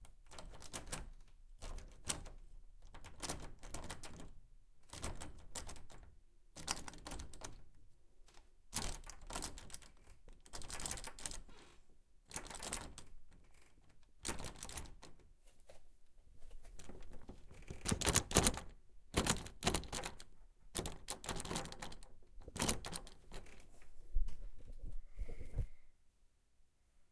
Door Handle jiggle
door handle violently jiggled as if locked
locked, stuck, close, shut, door, metal, jiggle, doors, handle